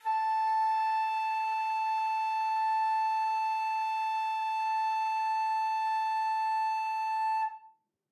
One-shot from Versilian Studios Chamber Orchestra 2: Community Edition sampling project.
Instrument family: Woodwinds
Instrument: Flute
Articulation: non-vibrato sustain
Note: A5
Midi note: 81
Midi velocity (center): 95
Microphone: 2x Rode NT1-A spaced pair
Performer: Linda Dallimore